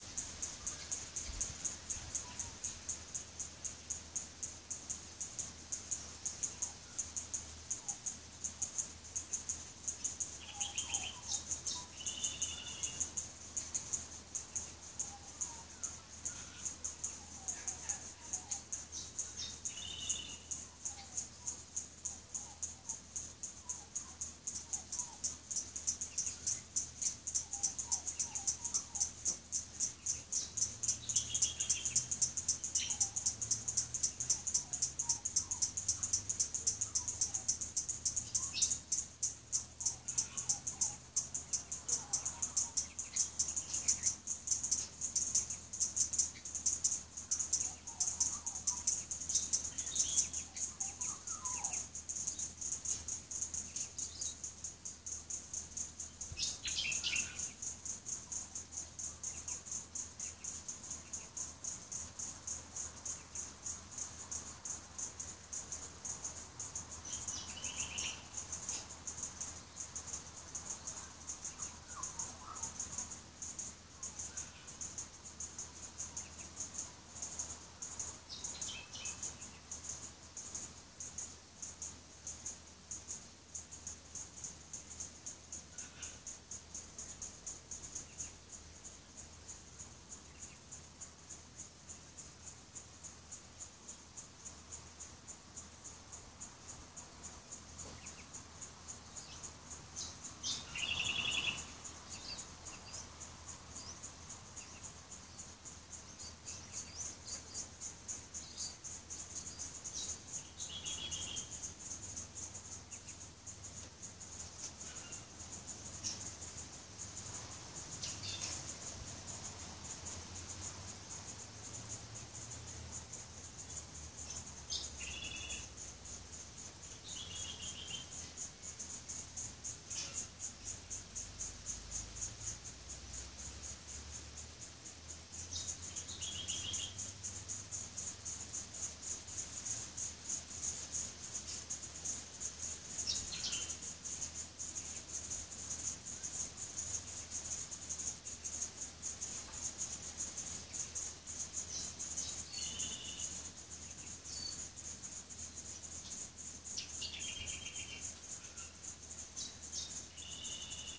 Recording the birds and insects in my garden on a hot summers day. Cicadas click and sing loudly. The occasional distant car can be heard faintly. There are magpies, butcher birds and lots of little birds that I don't know all the proper names but call them fan-tail, silver-eye, honey-eater and fairy wren.
Recorded with Samsung phone (Galaxy S4)
summer, birds